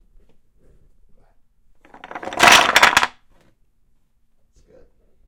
FX Blocks Topple 02
With an assist from my daughter, a toppling tower of wooden blocks.